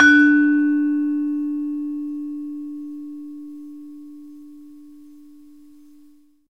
Sample of a demung key from an iron gamelan. Basic mic, some compression, should really have shortened the tail a bit. The note is pelog 1, approximately a 'D'